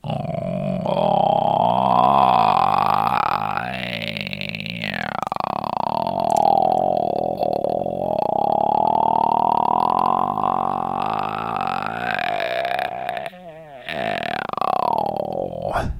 Growly 8b 120bpm
growly gnarly loop